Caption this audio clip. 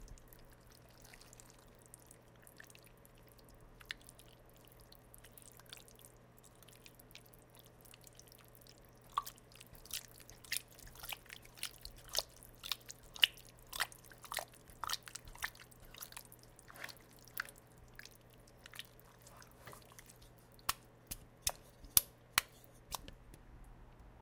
Moist Stirring Noise
Stirring some chili gets very amusing when you have a brain like mine ;)
moist, sound-effect, gross, stirring